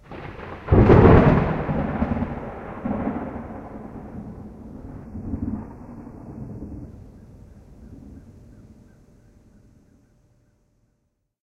big thunder clap
Dry thunder clap with no accompanying rain. Recorded with a Roland Edirol R-09HR and edited in Adobe Audition.